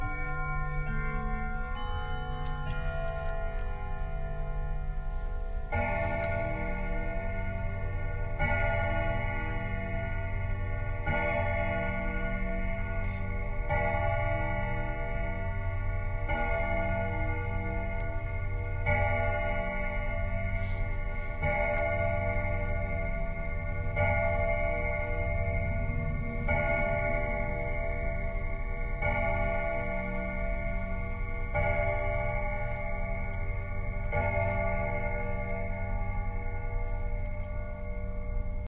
Clock strike
Medieval clock striking
antique, clock, Medieval, striking